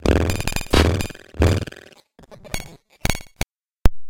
I have tried to (re)produce some 'classic' glitches with all sort of noises (synthetic, mechanic, crashes, statics) they have been discards during previous editings recovered, re-treated and re-arranged in some musical (?) way because what someone throws away for others can be a treasure [this sound is part of a pack of 20 different samples]